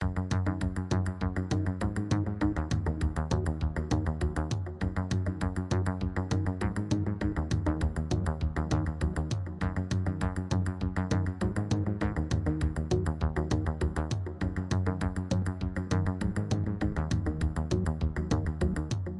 The Plan - Upbeat Loop - (No Voice Edit)
This is a shorter loopable version of my sound "The Plan - Upbeat Loop".
The voice has been removed.
It has better seamless looping than the original.
fast, groovy, joyful, loop, planning, seamless, upbeat